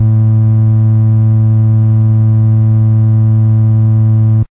Ace Tone-Basspedal 005
I recorded this Ace tone Organ Basspedal with a mono mic very close to the speaker in 16bit